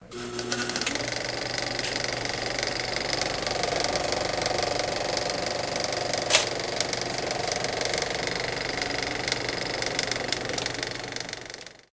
35mm-film-projector-start
The classic sound of a genuine film reel. They just don't make them like this anymore.
film-reel, movie-reel